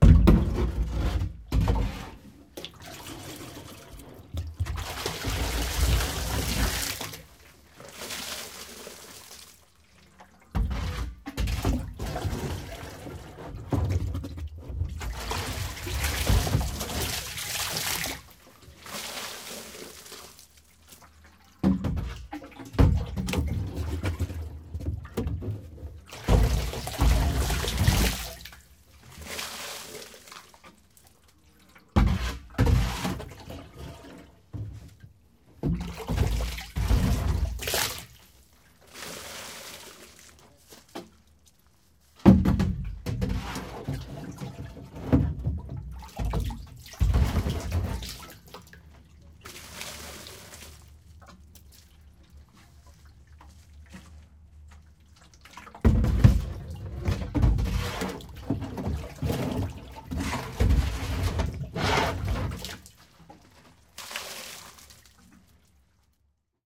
Scooping Water with Plastic Watering Can
Scooping rain water using a plastic watering can from within a water butt.
Recorded with a Zoom H2. Edited with Audacity.
butt, container, liquid, plastic, scoop, scooping, water, water-butt, watering-can